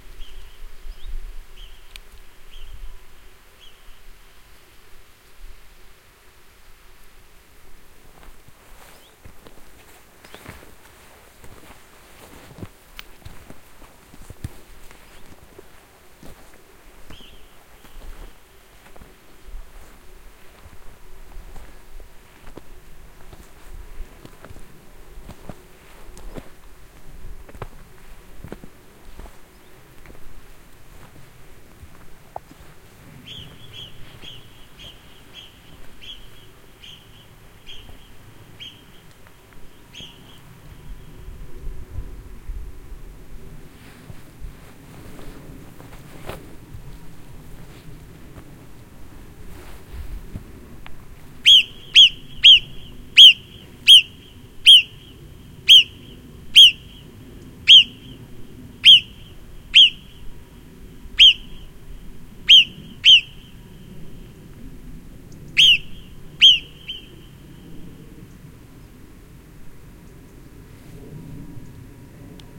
On a foggy day in swiss mountains recording scandalised marmots.
marmots, stream, mountains, field-recording